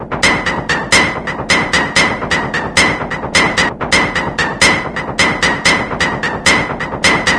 A kind of loop or something like, recorded from broken Medeli M30 synth, warped in Ableton.
loop, broken, lo-fi